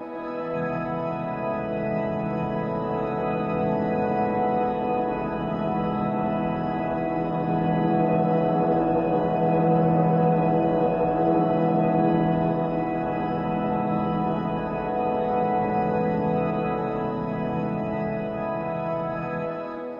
Layered bell tones generated in CoolEdit. Reverb, echo and noise reduction added.
airy, ambience, atmospheric, background, bell, bell-tone, cinematic, layered, mellow, pad, processed, soundscape, spacey, synth